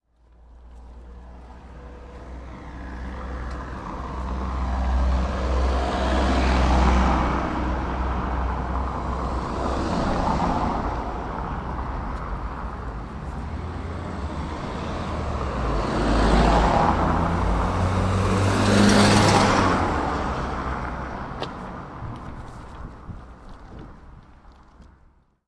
Vehicle passing - driving car - lorry - van - bus - tractor - truck
Vehicle passing
Recorded and processed in Audacity